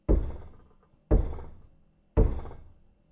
Crockery Shaker 02 x3 alt
All of the crockery being shaken by builders (with sledgehammers) demolishing an old conservatory next door.
Demolition, crockery